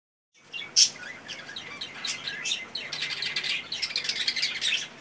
Sound of birds chirping